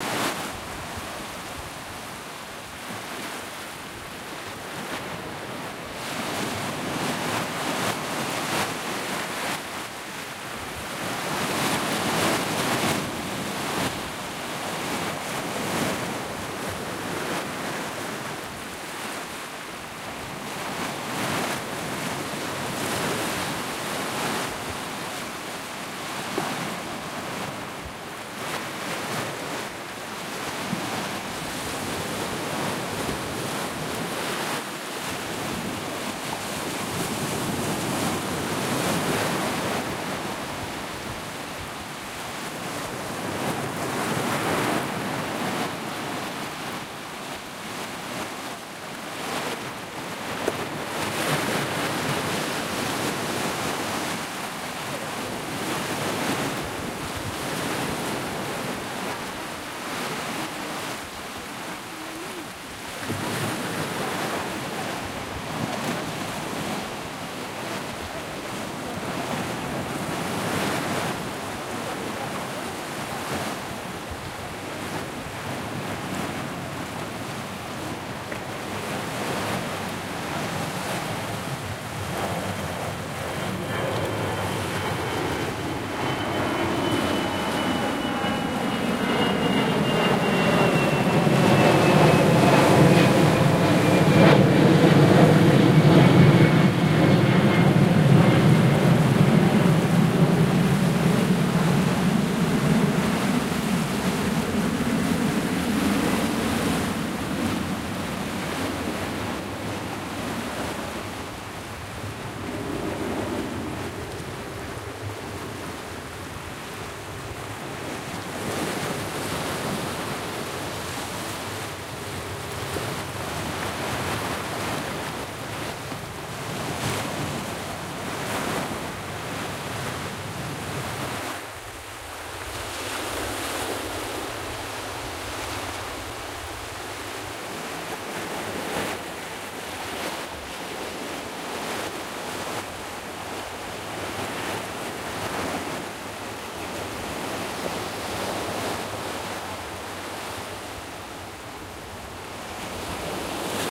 Playa Urbanova Avion El Altet
On the beach in Alicante near the airport.
Airplane, Ambience, Beach, Plane, Sea, Spain, Water, Waves